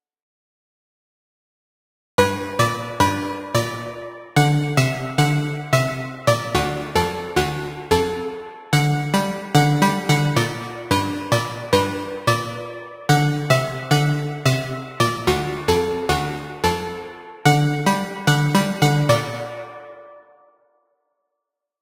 City Wind Synth Loop 1
Wide wandering synth melody made with FM synthesis.
[BPM: 110]
[Key: G minor]
110, 110-bpm, City, City-Wind, FM, FM-Synthesis, Frequency-modulation, G, Loop, Synth, Synth-Loop, Wind, bass, bpm, electronic, g-minor, harmonic, hybrid, key, key-of-g, minor, operator, rich, rich-timbre, stereo, thick, wide